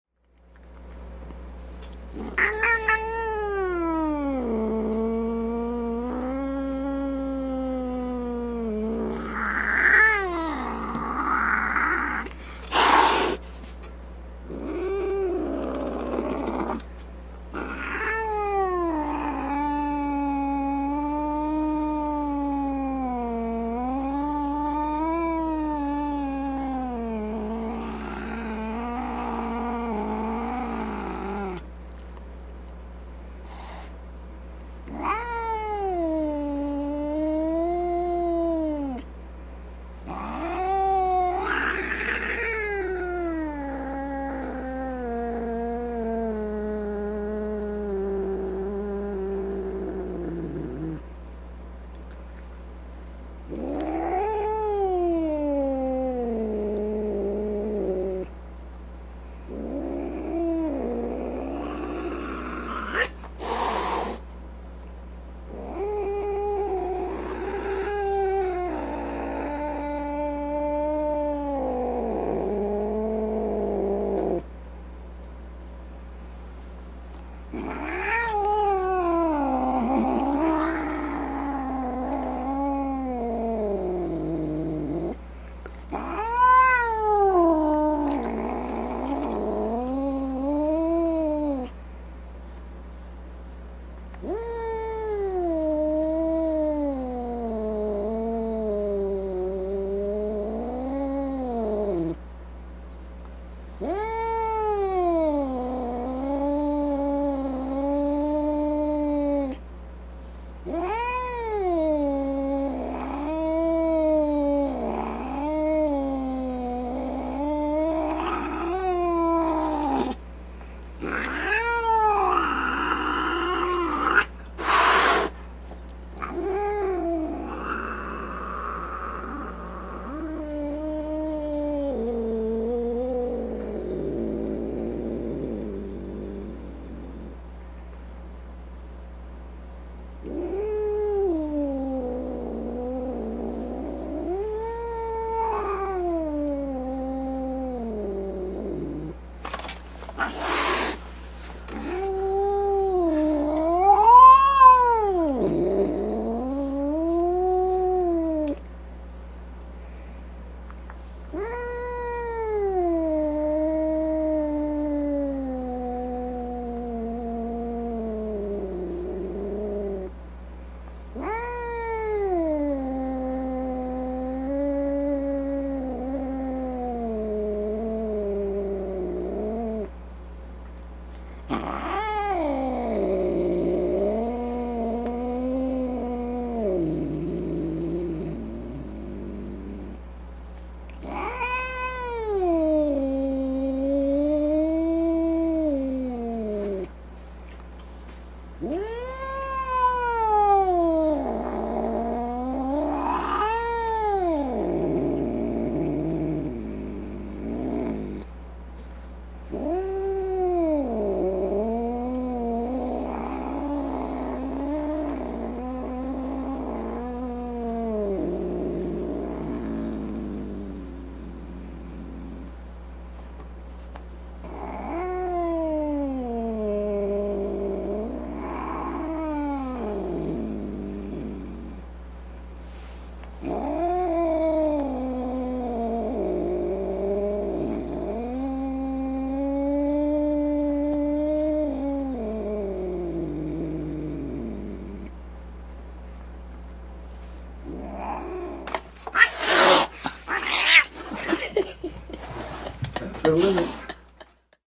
Funny Cat Always Grumpy Kitty
Over 4 minutes of continuous angry cat sounds, including growling, hissing, and a couple of snorts. Our cat is being handled very gently by my daughter, and as predicted, she expresses her displeasure with sitting on top of my daughter's lap - even when being rubbed.
If you use the sound, please be so kind as to send me a link of how it was used. I would love to see it.